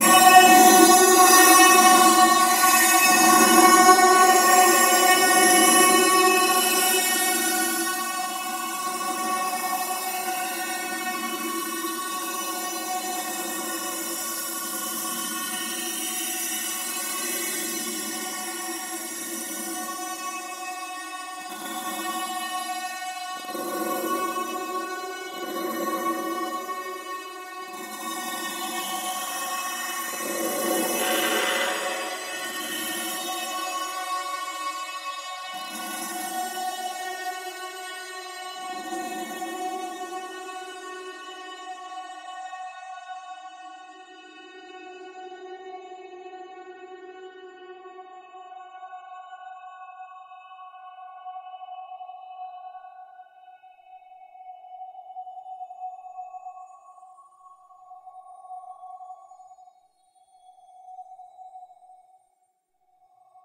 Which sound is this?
san56lg6
Minor third,just interval with granular time stretch
timestretch, santoor, granular